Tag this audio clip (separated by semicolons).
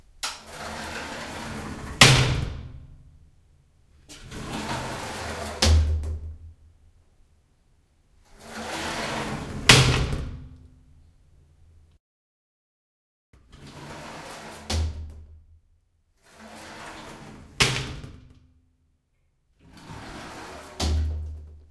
close; door; glass; open; plastic; rattle; shower; slam; slide